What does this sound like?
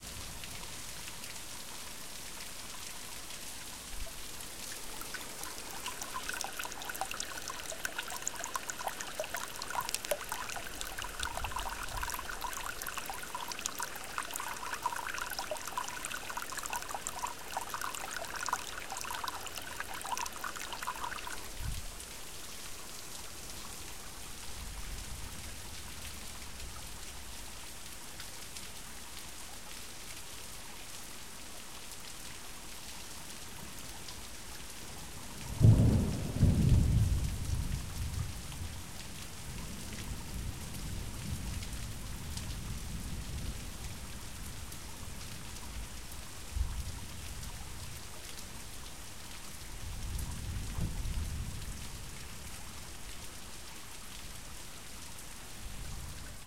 Rain and storm, water pouring
Falling rain, some parts have clos ups from water pouring on to sewage.
water, pour, liguid, rain, storm, drops